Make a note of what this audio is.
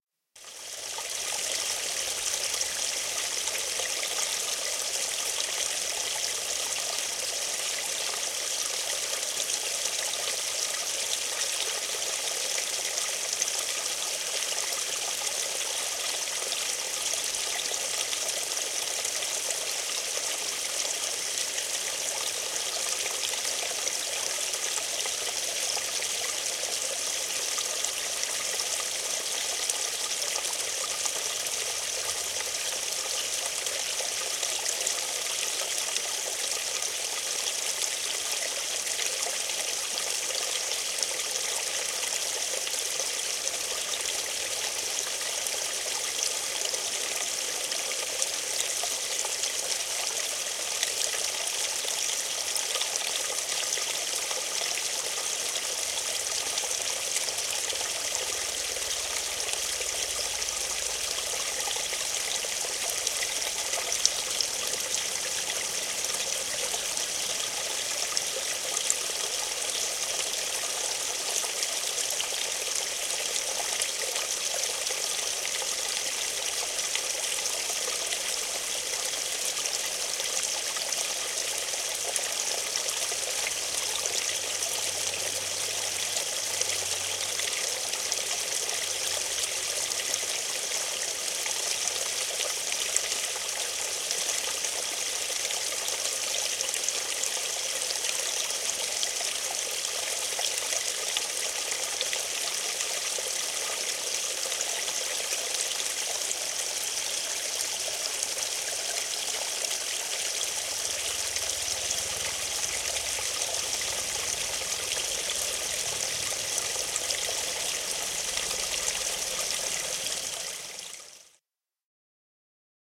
Puro lirisee / Small brook gurgling in the mountains
Pieni puro, lirinää ja lorinaa vuoristossa.
Paikka/Place: Sveitsi / Switzerland
Aika/Date: 01.09.1990